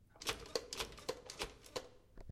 Pushing the soap dispenser with the hand before hand washing. It has been recorded with the Zoom Handy Recorder H2 in the restroom of the Tallers building in the Pompeu Fabra University, Barcelona. Edited with Audacity by adding a fade-in and a fade-out.
zoomH2handy, WC, push, UPF-CS14, soap